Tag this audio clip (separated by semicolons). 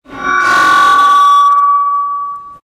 Feedback; Microphone